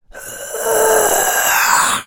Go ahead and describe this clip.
A voice sound effect useful for smaller, mostly evil, creatures in all kind of games.
Speak, gaming, vocal, Talk, gamedev, voice, imp, small-creature, RPG, sfx, gamedeveloping, kobold, indiedev, arcade, indiegamedev, Voices, videogame, game, goblin, videogames, minion, creature, fantasy, games